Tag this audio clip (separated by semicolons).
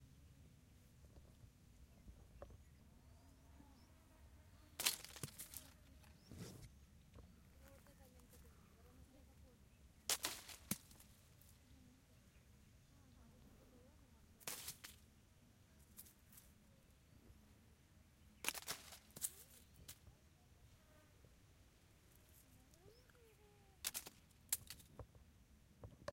effect; H6; SFX; sound; sync; ZOOM